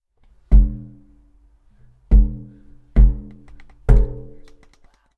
Bassy Tire Hit
Stomping on a large tire in boots
kick, hard